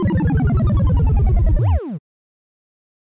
Videogame Death Sound
8-bit like sound for when the player dies, or anything bad happens.
death, game-over, videogame